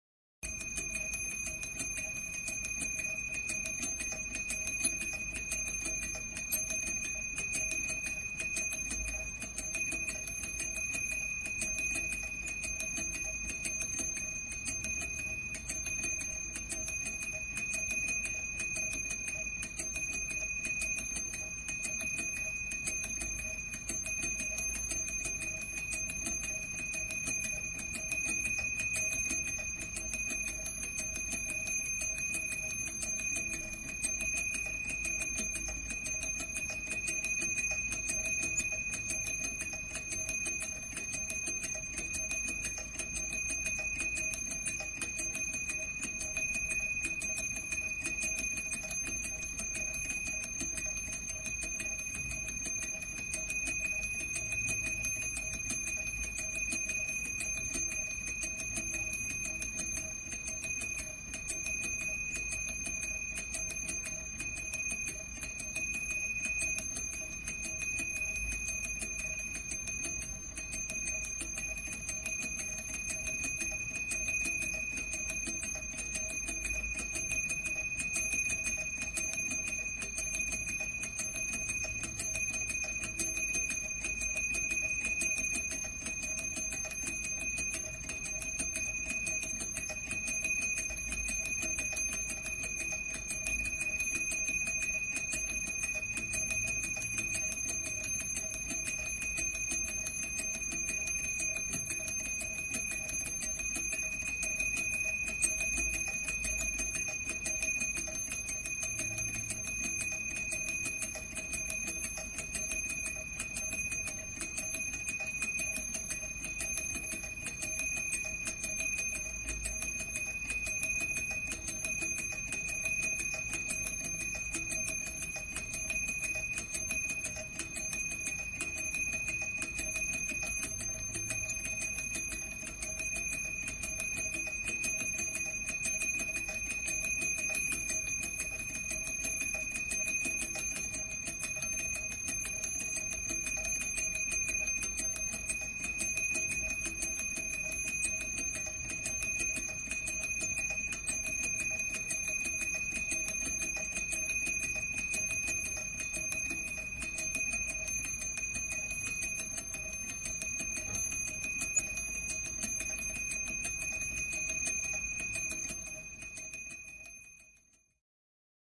Enkelikello, kilinä / Angel chimes jingling
Kello kilisee.
Angel chimes.
Paikka/Place: Suomi / Finland / Nummela
Aika/Date: 01.01.1992
Angel-chimes, Bell, Chime, Chimes, Christmas, Enkelikello, Field-Recording, Finland, Finnish-Broadcasting-Company, Jingle, Joulu, Kello, Soundfx, Suomi, Tehosteet, Yle, Yleisradio